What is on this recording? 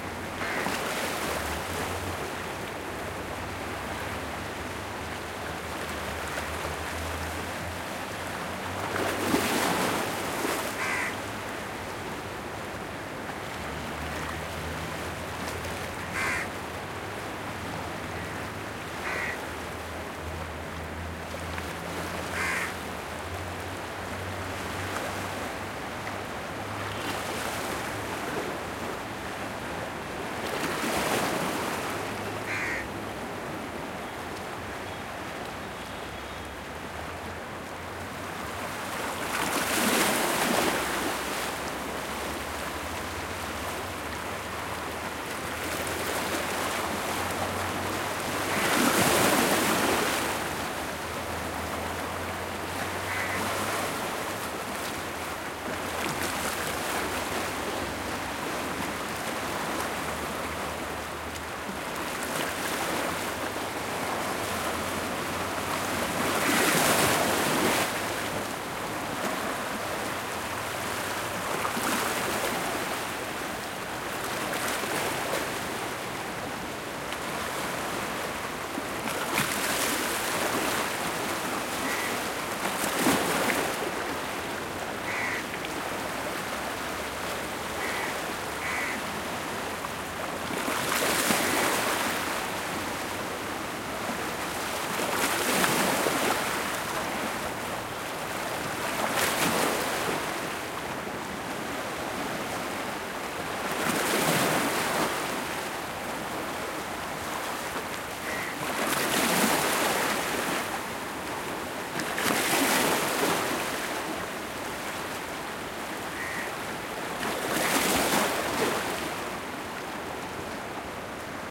waves ocean or lake lapping at rocky shore with distant traffic horn honks like Scotland lake for way out at sea except for crow and cars India
lake, lapping, waves, traffic, shore, horn, India, distant, honks, or, sea, rocky, ocean